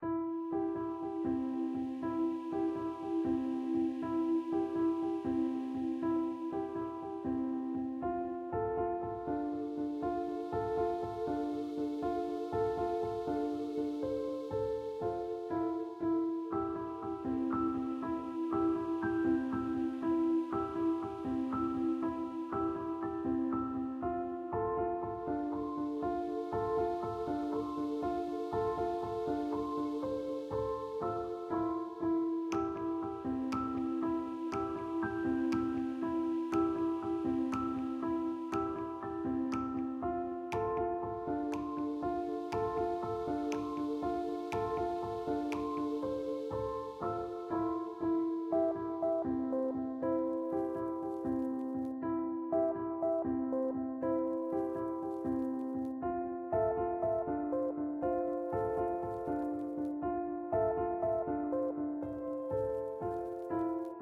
Wonderful - Calm Beautiful Piano Loop
This is a favorite of mine. A calm atmospheric piano loop that you can just chill out listening to. Use it in vlogs, or any other type of videos. Can be used in games too of course, only your imagination sets the limits. Enjoy!
atmospheric, warm, soft, ambient, calm, melodic, video, chill, loop, spacey, piano, beautiful, chillout, vlog